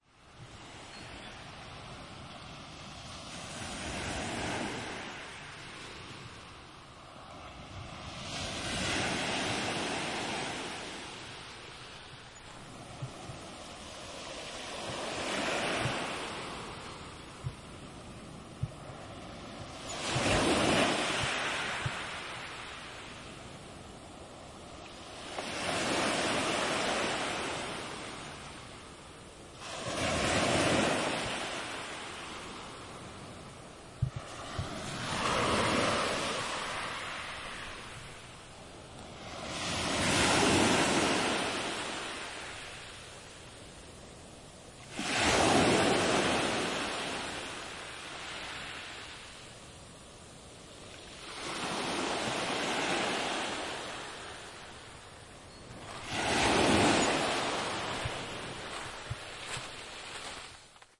NB beach medium waves bayFundy XY
Medium perspective of rocky shoreline Bay of Fundy, Irving Nature Park, St John New brunswick. This is the front pair (XY) of a quad recorded with H2